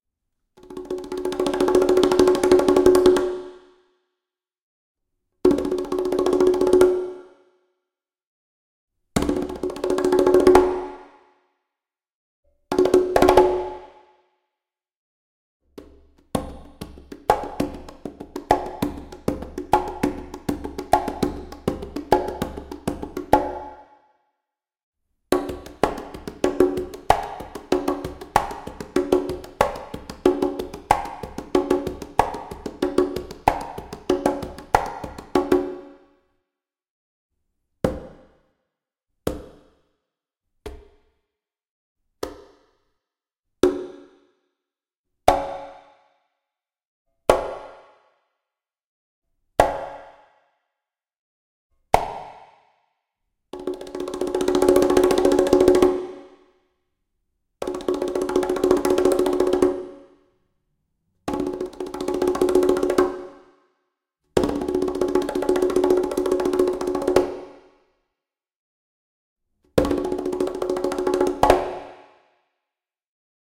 high conga wet
Higher tuned conga samples, rolls, short grooves, etc. with added quality reverberation.
conga, drum, latin, percussion, roll